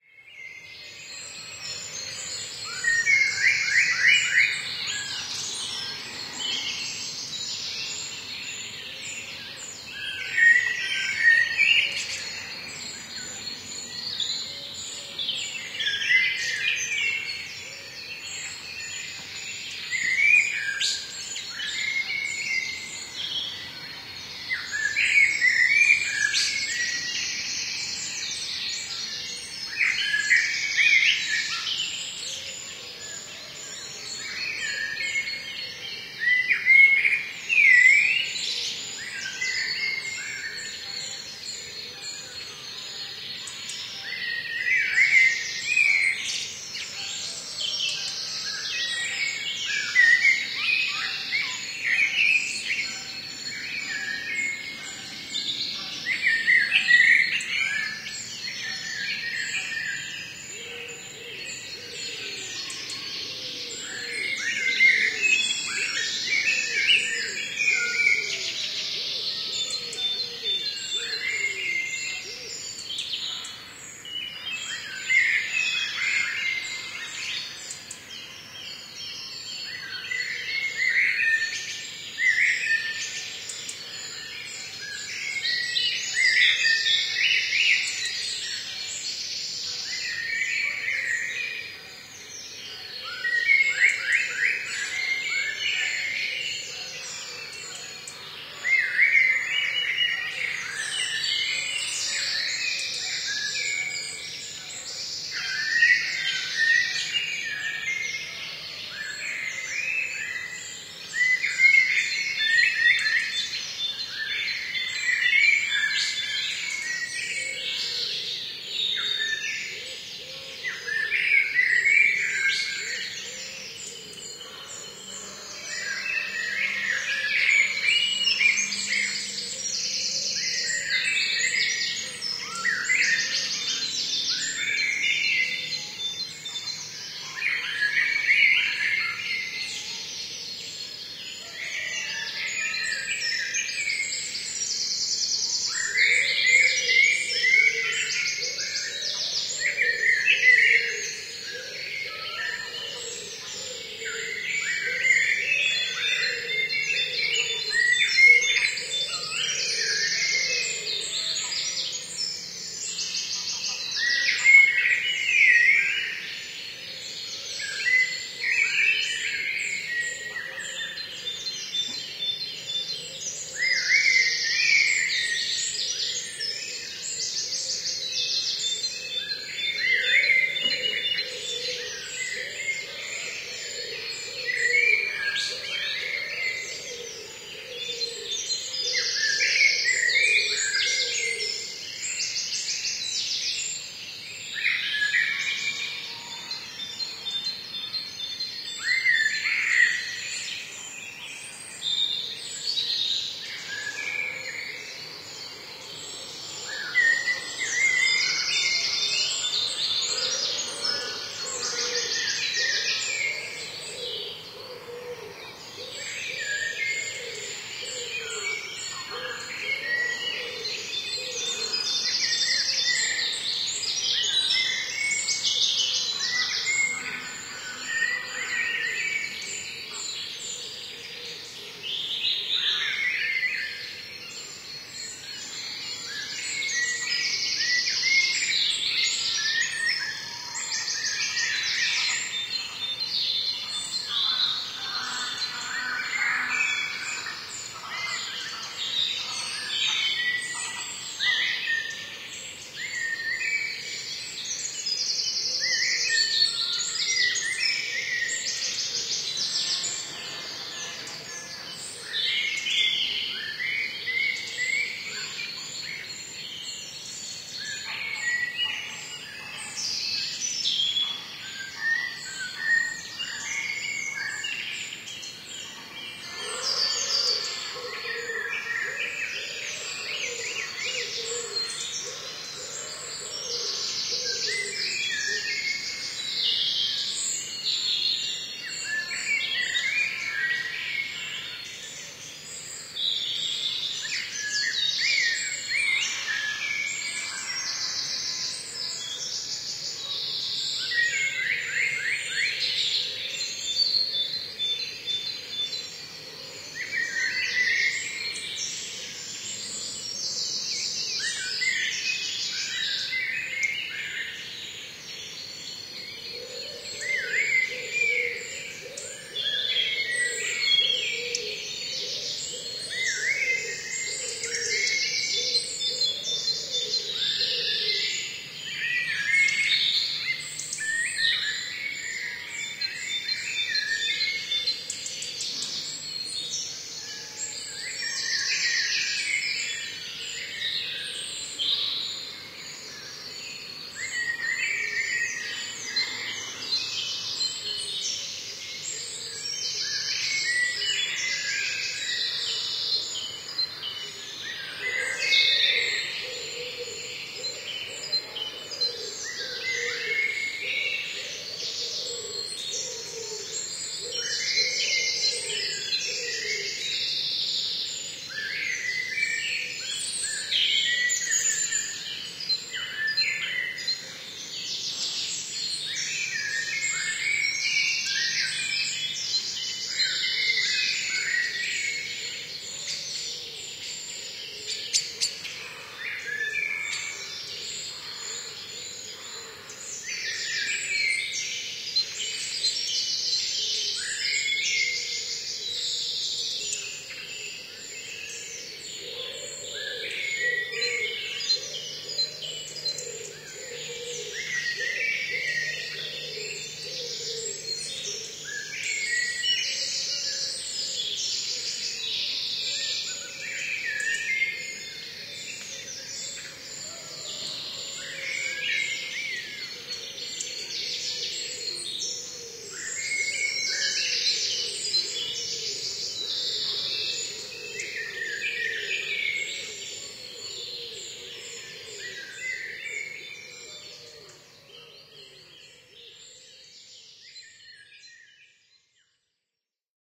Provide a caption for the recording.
Woodland dawn chorus Stereo
Bird song in Royden Park on the Wirral Peninsular UK. Recorded with a pair of Rode NT5s mounted on an Olson Wing Array clone into an ancient Tascam HD-P2. Best with headphones.
birdsong, nature